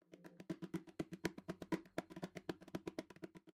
Guitar Slap Manipulated
Me slapping my guitar, manipulated using pitch and tempo changes.